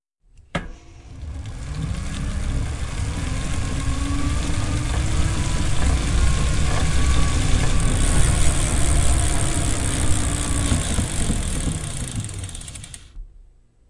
Proyecto SIAS-UAN, trabajo relacionado a la bicicleta como objeto sonoro. Registros realizados por: Julio Avellaneda en abril 2020